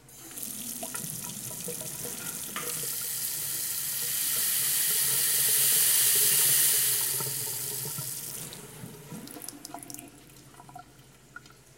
The tap, from low to high intensity opens and then closes slowly.
Foreground.
Recorded with Zoom H4.
Deleted teh background noise and increased the intensity of water in Adobe Audition.
recording, water